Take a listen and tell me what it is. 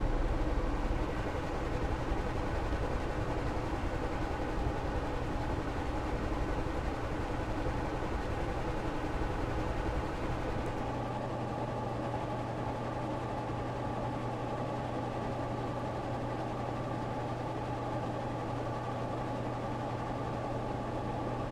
air filter 01(fast)
a home air-filter running at a fast speed - take 1
air-filter, fan, ac